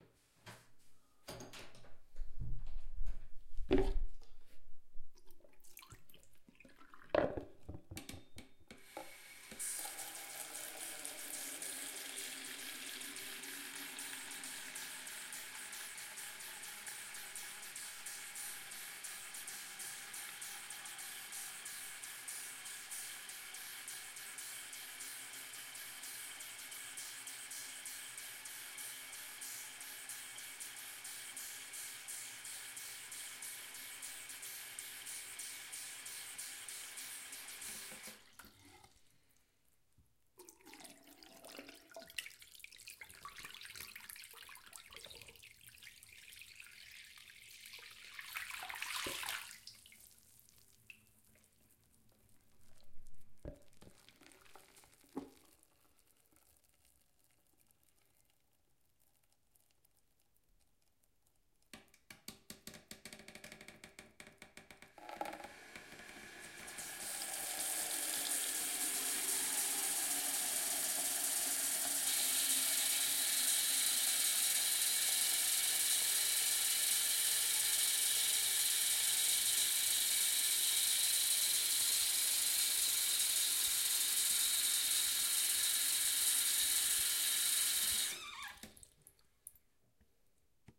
A stereo recording of my sink running, apologizes for the beginning since there is some handling noise. As for credit, no need, enjoy.
running, sound